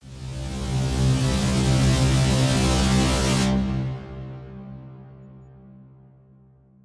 MH-ElectronRiseUp
bass, electro, synth